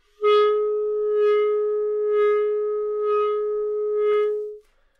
Clarinet - Gsharp4 - bad-dynamics-tremolo
Part of the Good-sounds dataset of monophonic instrumental sounds.
instrument::clarinet
note::Gsharp
octave::4
midi note::56
good-sounds-id::828
Intentionally played as an example of bad-dynamics-tremolo